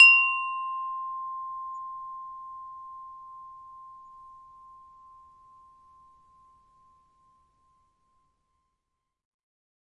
windchime tube sound